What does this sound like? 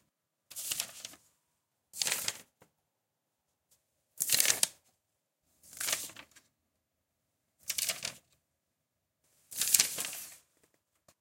Picking up one paper off of the floor.
grab, paper, page, floor